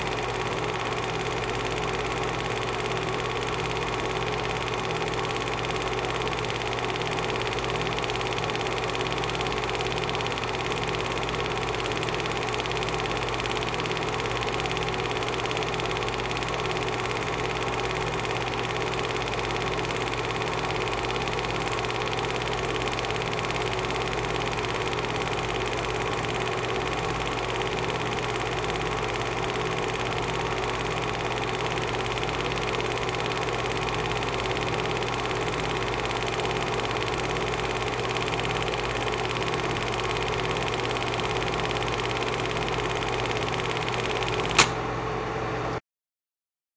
Bell & Howell 8mm film projector.
camera, bell, projector, film, movie, field-recording, cinema, cinematic, 8mm, cine, Howell, reel